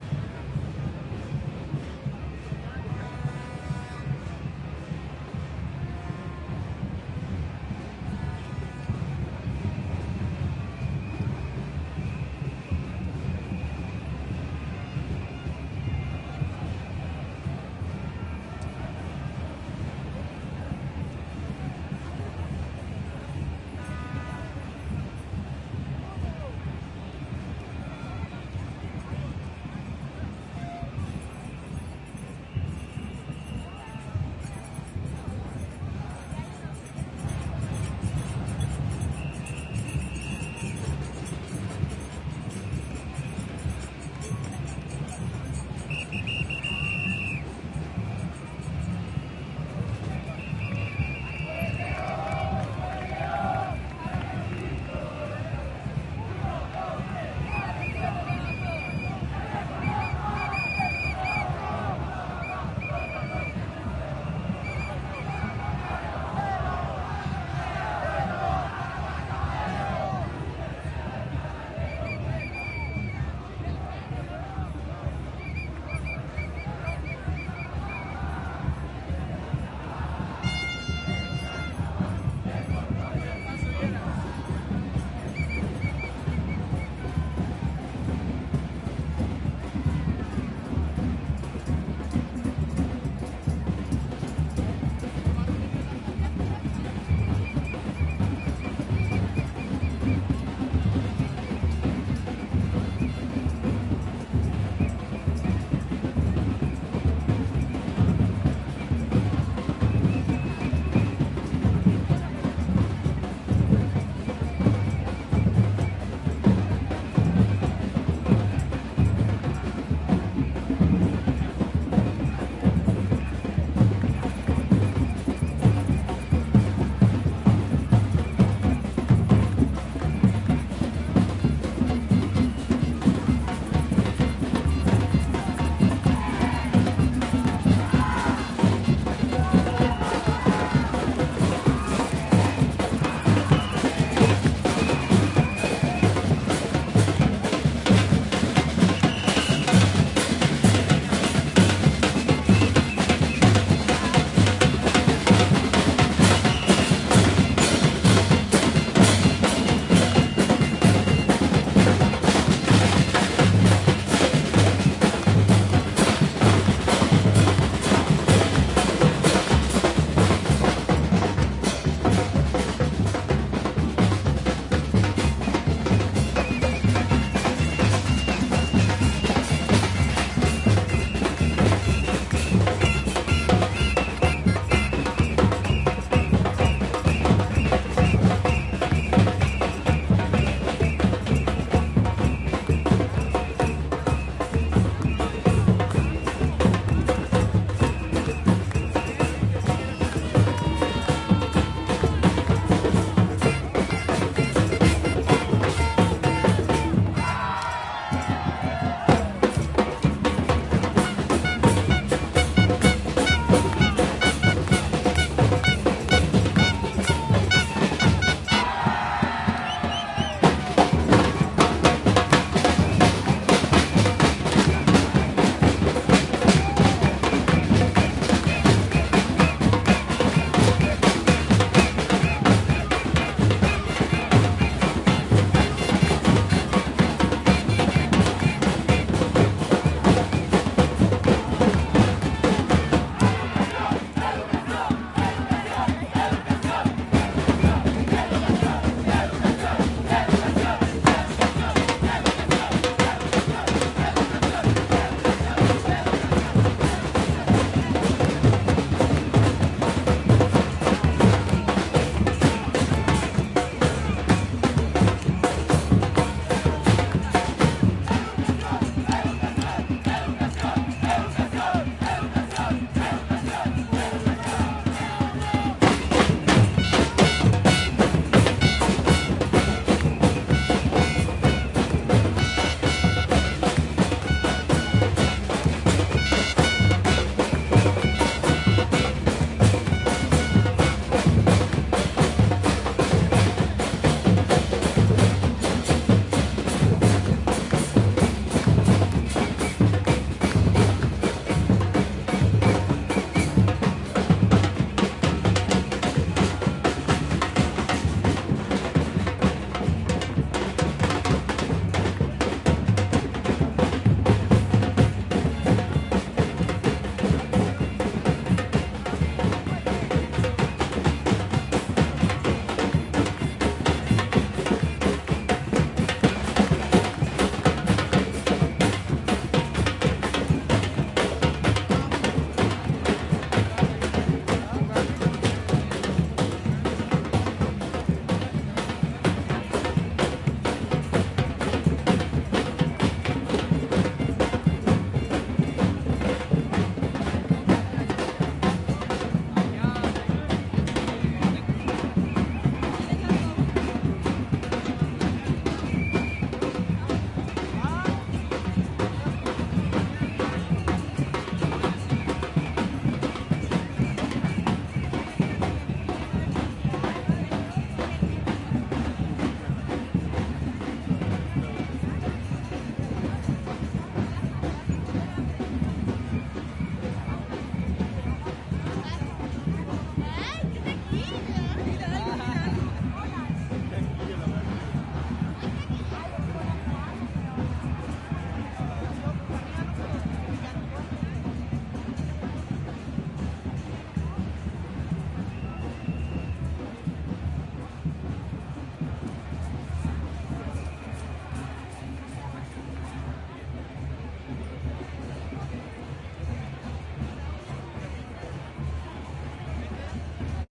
Marcha estudiantil 14 julio - 01 batucada nitida
Multiples batucadas de izquierda a derecha en la marcha por una educación gratuita y de calidad. Estallan petardos al final.
Santiago, Chile, 14 de Julio 2011
educacion, gente, marcha, nacional, paro